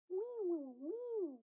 the sound of a distressed cat talking.
Cat, distressed, talk